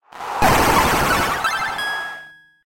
Goal SFX
A sound effect made to convey when a goal was scored in a video game.
SFX, Video-Game, 8-bit